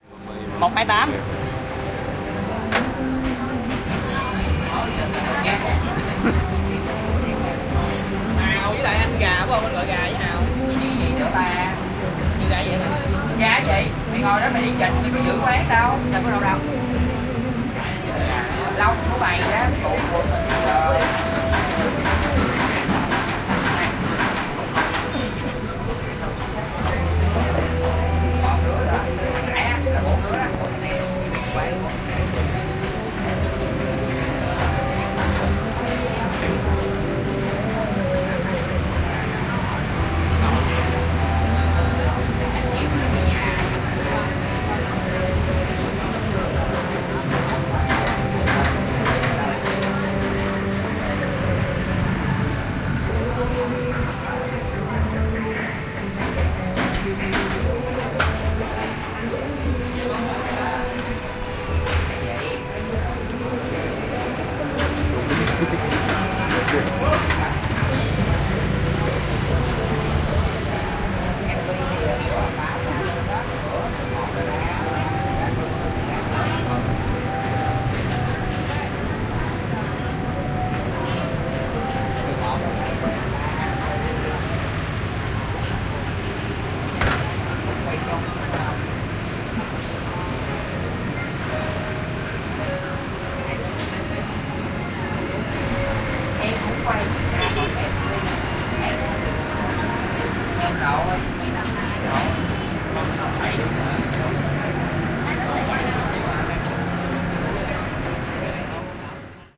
SEA 11 Vietnam, Ho Chi Minh City, Street atmo at Street restaurant (binaural)
Street ambience in a street restaurant in Ho Chi Minh City / Vietnam,
Vietnamese voices, music from loudspeakers, traffic, construction site in the background,
Date / Time: 2017, Jan. 08 / 20h16m